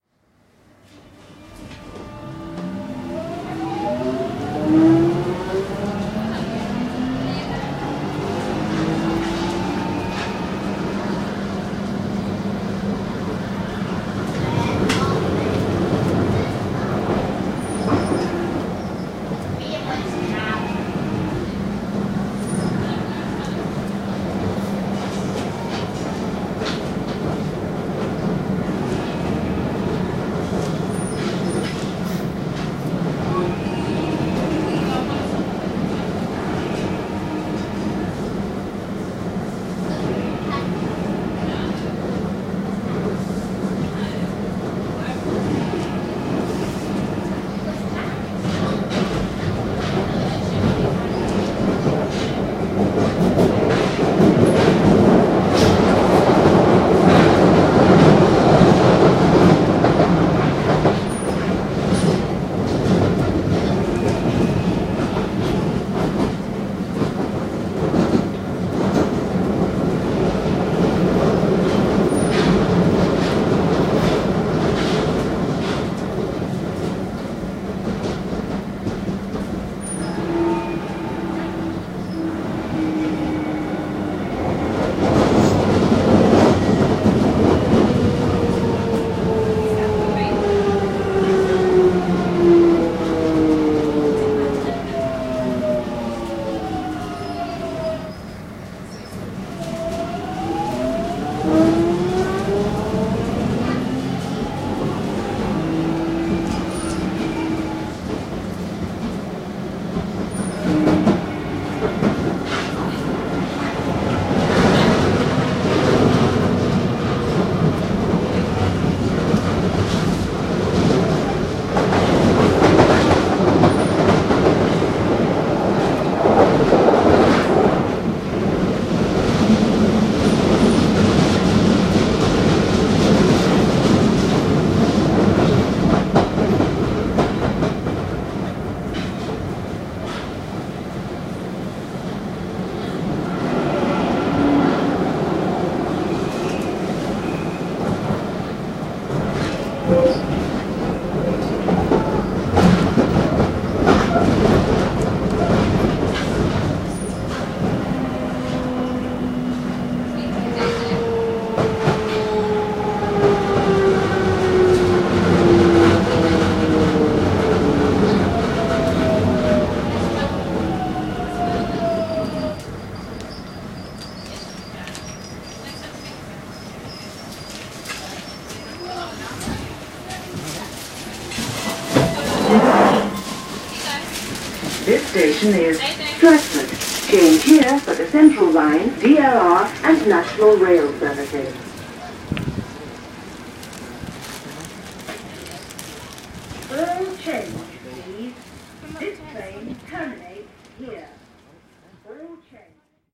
London Underground- journey to Statford
From A to B- from West Ham to Stratford. On the Tube. On the Jubilee Line. Train arriving and departing, door sounds and beeps, in-train announcements ('All change please' at Stratford since this is the line's terminus). Recorded 18th Feb 2015 with 4th-gen iPod touch. Edited with Audacity.
people, jubilee-line, beep, beeps, stratford, subway, jubilee, depart, talk, ambience, tube, arrive, london-underground, london, underground, metro, tube-station, train, field-recording, all-change-please, station, voice, doors, in-train-announcements, close, west-ham, open, announcement, ambiance, tube-train